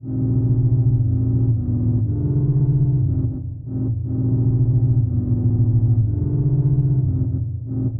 tuby bass horn electronic f e g f 120bpm